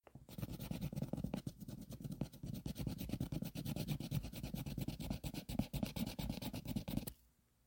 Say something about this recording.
This is the sound of scratching a paper